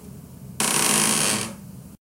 door opening
dark, voice, action, Mystery